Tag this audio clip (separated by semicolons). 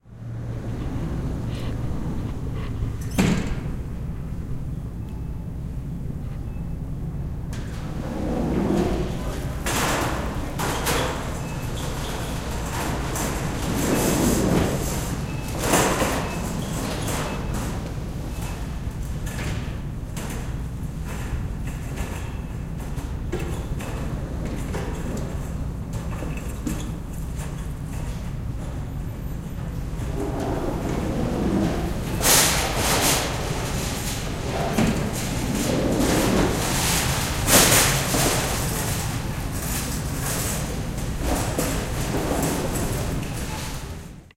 automatic-door; cart